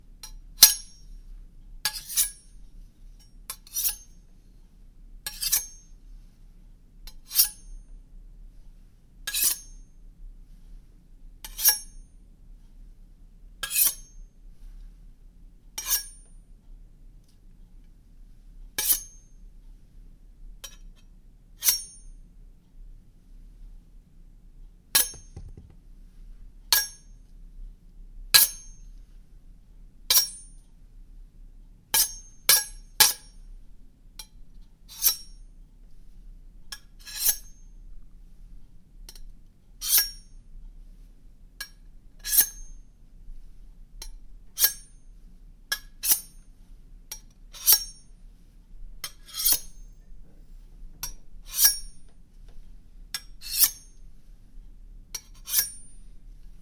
Two chef's knives sliding against each other
Sliding two big kitchen knives against each other, to get that cinematic "knife coming out of the scabbard" sound. Some good decay. Could be used for medieval, ninja, horror or kitchen sounds.
blade, clang, clank, cut, cutlery, fight, hit, horror, horror-movie, impact, kitchen, knife, knife-fight, knight, knives, medieval, metal, metallic, ninja, scabbard, slice, stab, steel, sword, sword-fight